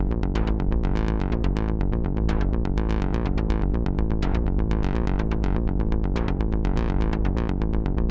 Drones and sequences made by using DSI Tetra and Marantz recorder.
Tetra, Synth, Analog